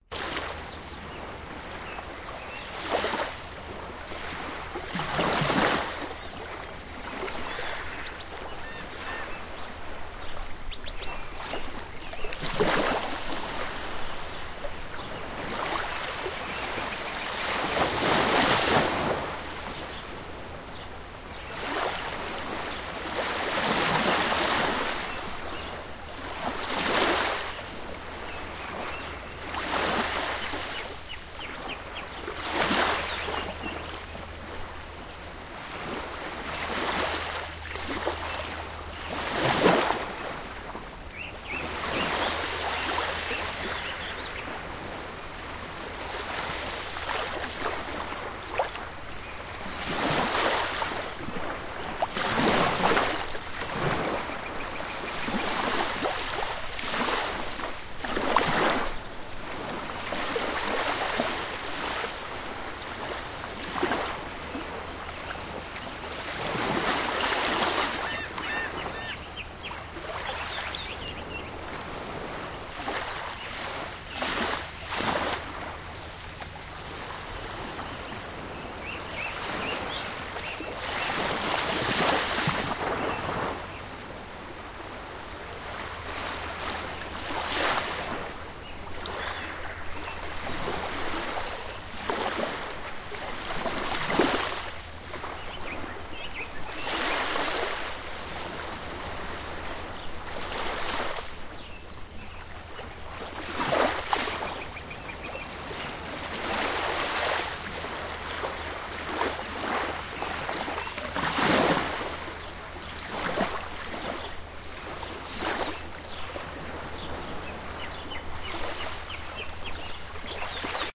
Atlantic Ocean, Ocean Waves Ambience sound
Atlantic ocean surf recorded on the beach right at the water's edge during an evening in September 2009. Some birds (plovers?) can be heard towards the end of the recording.